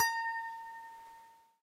Guitar string plucked at headstock
This is a single guitar string plucked with a pick directly at the headstock, that means between the tuners and the nut. There the string is very short and tight, resulting in a high, almost piano-like tone. Also, since there is no sound box, the tone is rather quiet.
I used this for a sampler and I liked the sound of it. This is the raw recording. If you want to use it in a sampler too, I recommend adding some EQ, Reverb and maybe some delay. It is not perfectly in tune though, the closest note is A#. You might tweak the tuning a little bit when using it in combination with other tuned instruments.
Microphone: AKG Perception 120
Post-Processing: None
plucked, sample, string, instrument, single-notes, guitar, one-shot